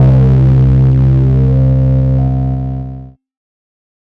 Acid Bass: 110 BPM C2 note, not your typical saw/square basslines. High sweeping filters in parallel Sampled in Ableton using massive, compression using PSP Compressor2 and PSP Warmer. Random presets, and very little other effects used, mostly so this sample can be re-sampled. 110 BPM so it can be pitched up which is usually better then having to pitch samples down.
bpm, 909, hardcore, beat, sub, noise, effect, house, glitch-hop, electronic, 808, 110, dance, techno, acid, dub-step, club, bass, glitch, rave, trance, processed, porn-core, resonance, bounce, synth